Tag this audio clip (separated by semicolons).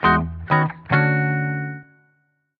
incorrect; music; wrong; error; guitar; sfx; game; short; loss; smooth; failure; mistake; answer; fail; game-over; quiz; jazz